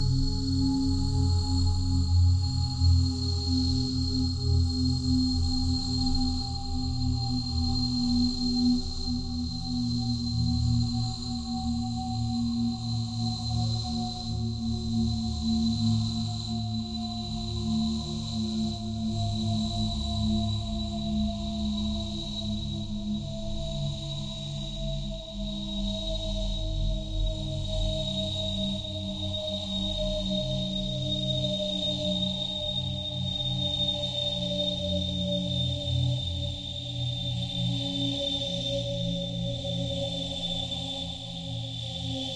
Slowing Down from Warp Speed
The sound of a starship's engines throttling back out of some crazy galaxy-hopping FTL drive. The roar gives the sense that it was an energetic peak at some point. Was originally bells. Recorded on Zoom H2.
background, bass, core, drive, engine, fiction, future, futuristic, jet, nacelle, science, science-fiction, sci-fi, scifi, sfx, slow, slowing, sound-effect, space, spaceship, speed, starship, thrust, warp, warp-drive, Warp-speed, weird